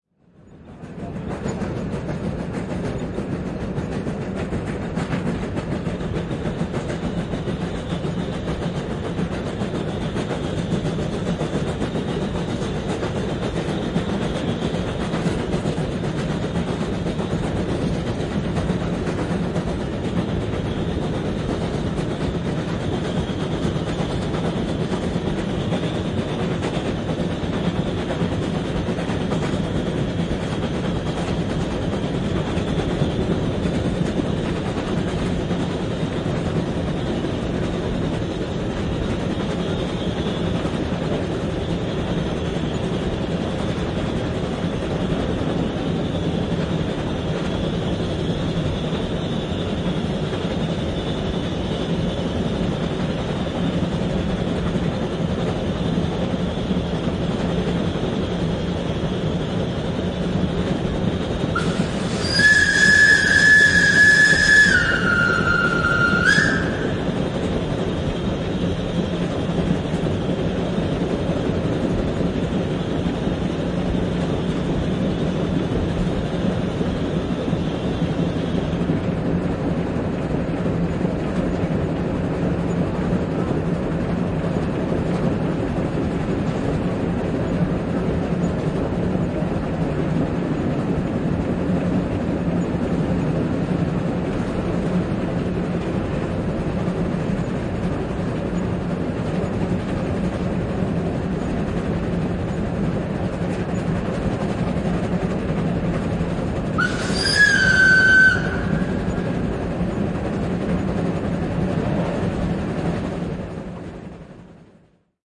Höyryveturi, kulkua / Steam locomotive running, whistling, interior
Höyryveturi kulkee, vauhti kihtyy. Vihellyksiä. Sisä.
Paikka/Place: Suomi / Finland / Pieksämäki
Aika/Date: 12.08.1981